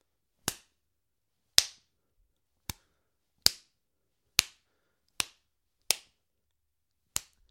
Open-handed slap onto face. Multiple versions.